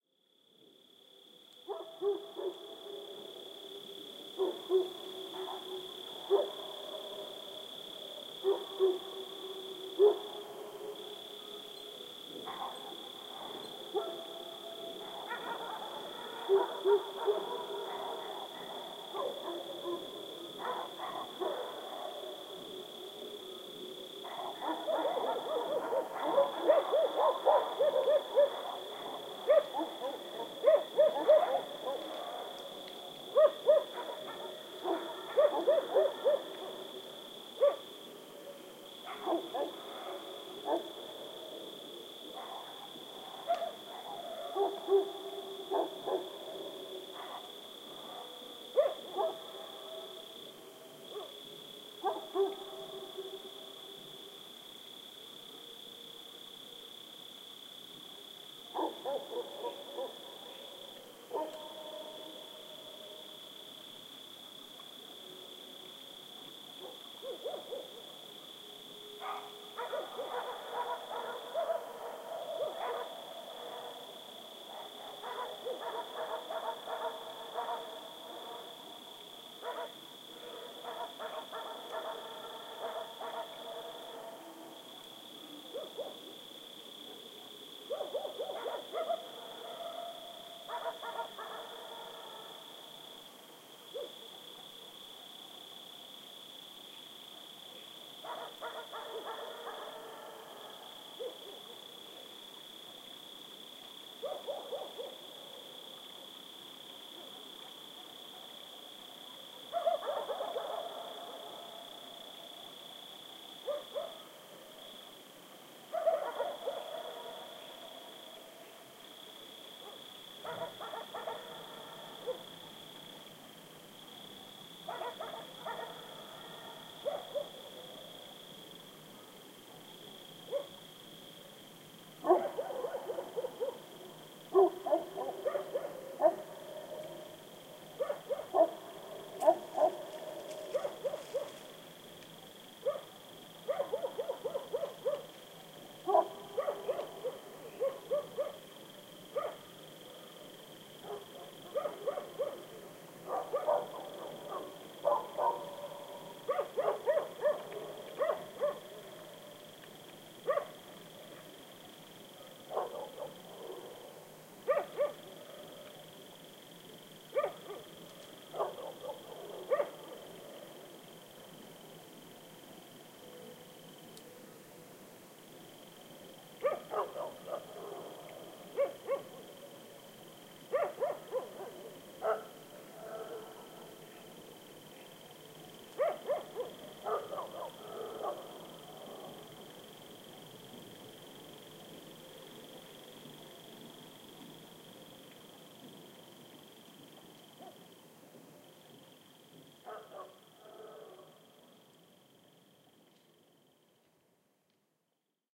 20160324 07.dogs.night.BP4025
Night ambiance, with a lot of dogs barking furiously not so far. There is strong reverberation from a nearby cliff. Recorded at Bernabe country house (Cordoba, S Spain) using Audiotechnica BP4025 inside blimp, Shure FP24 preamp, PCM-M10 recorder.
ambiance, barking, crickets, dogs, field-recording, insects, nature, night, spring